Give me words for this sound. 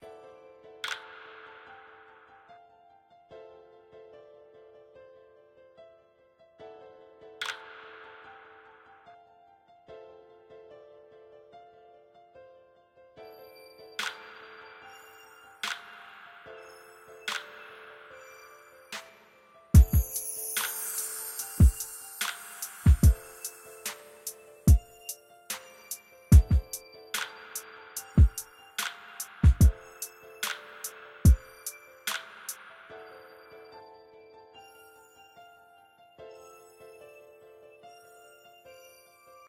Just a simple demo for a beat I am working on. I used FL Keys, Autogun, and Artsy Trap drums.
Enjoy!
piano, beat, 2017, free, 73, hiphop, looppacks, 2016, drum